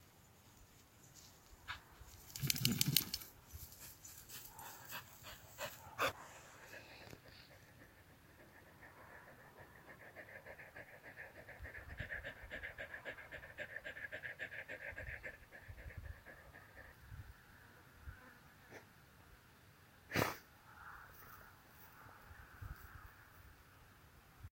Dog shakes himself and panting. Outdoor recording.
dog, panting, shake